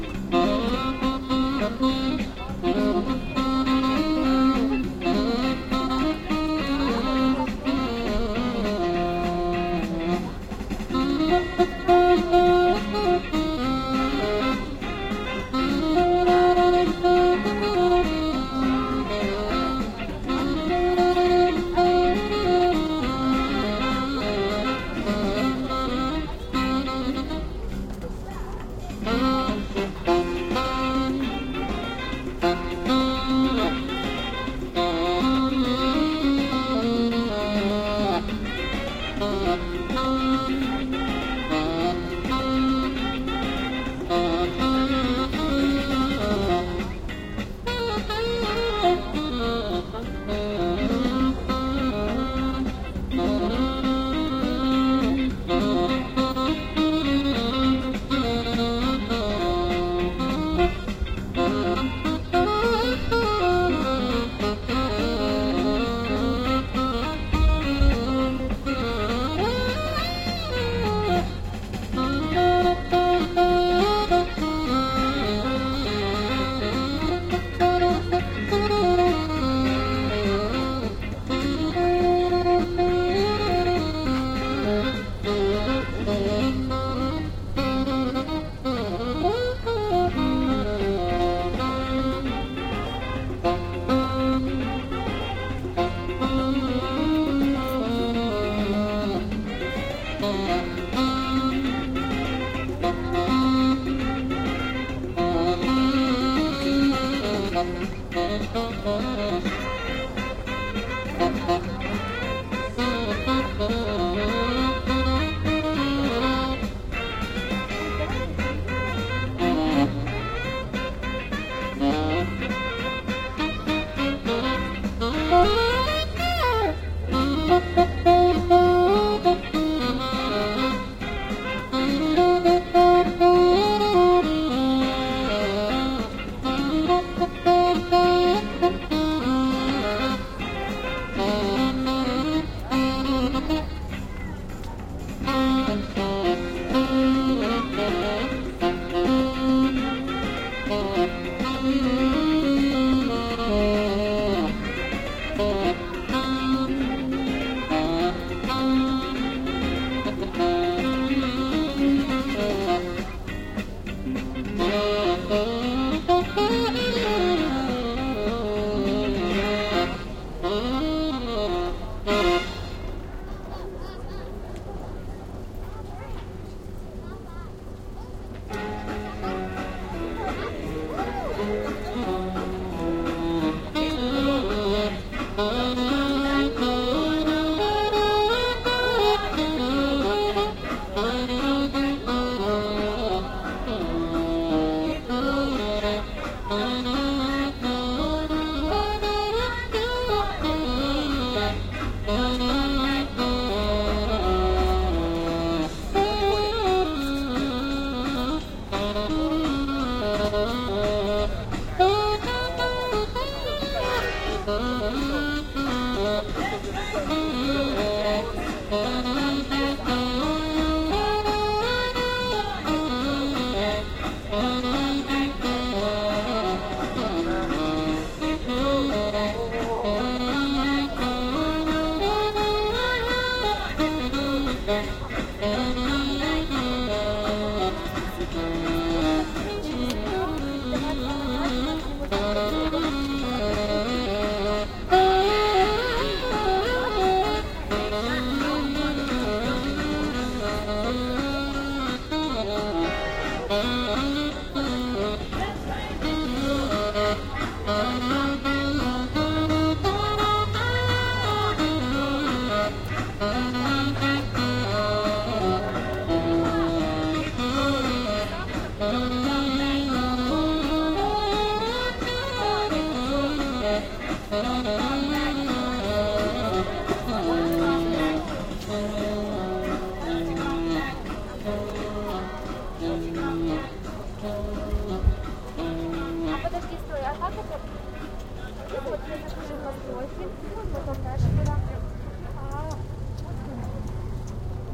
Sax player plays mixture of hit songs at the riverfront of Astana, Jul 16, OMNI mics
Sax player plays mixture of hit songs at the riverfront of Astana city, Kazakhstan, Jul 16, Roland R-26's OMNI mics
Kazakhstan, sax, street, street-musician, ambience, people, crowd, Astana, music, musician